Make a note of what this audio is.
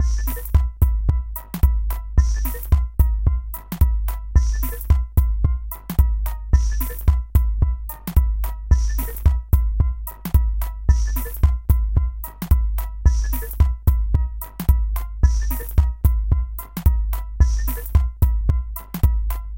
An extremely peculiar beat I made in Hydrogen drumstation. Detuned and randomly-pitched claves and cowbells behind a minimalistic beat. A surreal beat.